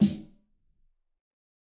Fatter version of the snare. This is a mix of various snares. Type of sample: Realistic